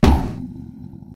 electro fire2
Video game sounds
games, sounds, game